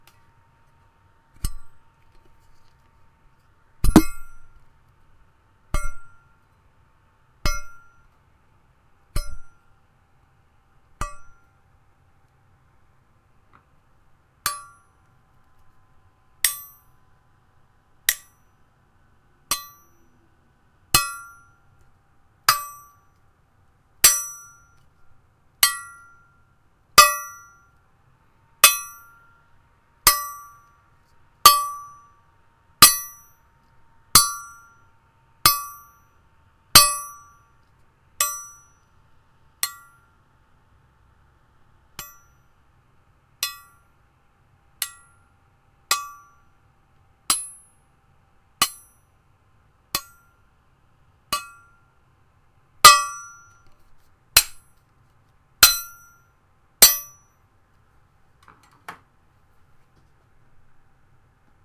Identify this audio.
Hitting a metal trowel. (Suggested use: cut and paste sounds you like and create a beat)
clang, clank, hit, impact, metal, metallic, percussion, percussive, strike, ting, trowel